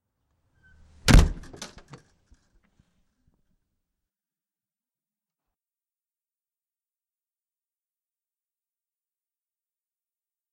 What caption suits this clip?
Front door slam
Closing the front door to my house.
close, door, slam